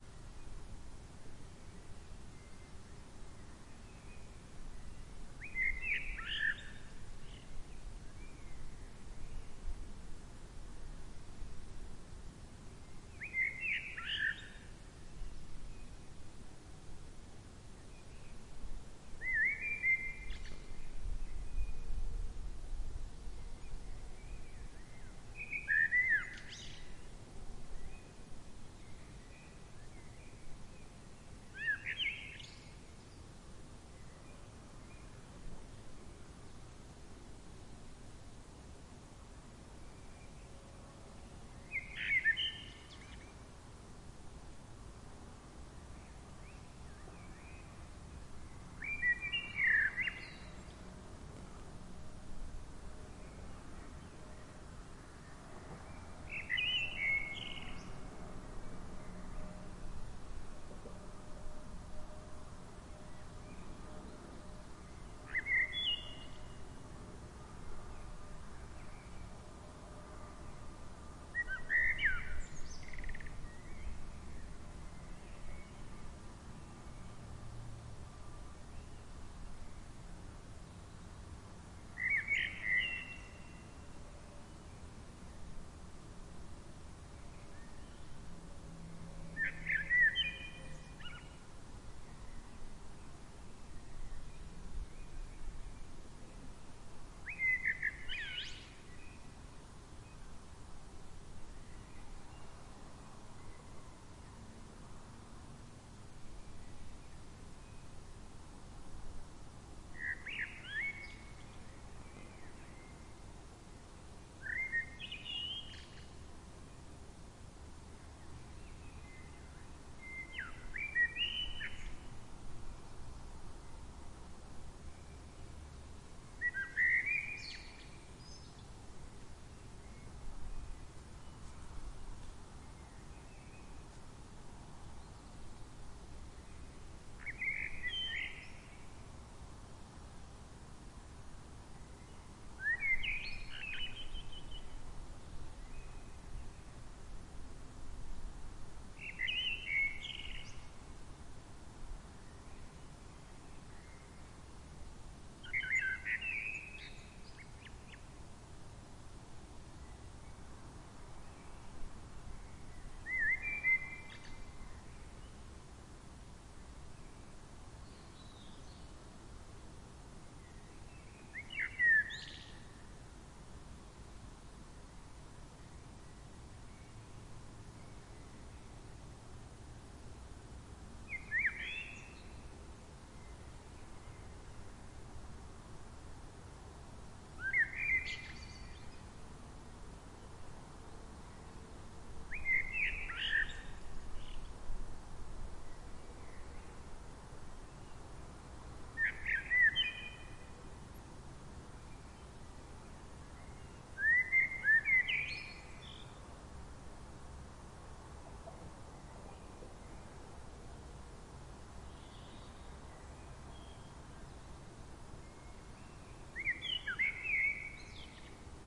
ZAGREB APRIL2017 VELESAJAM SLAVUJI 5AM
Zagreb fair open space (park) 3:00 AM, nightingales, some traffic in bgnd.
Recorded with my tascam dr-05.
3am, ambiance, ambience, atmos, atmosphere, birds, dawn, fair, field-recording, nightingales, open, soundscape, space, zagreb